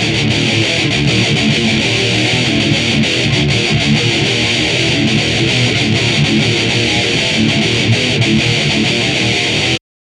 REV LOOPS METAL GUITAR 6
rythum guitar loops heave groove loops
groove, guitar, heavy, metal, rock, thrash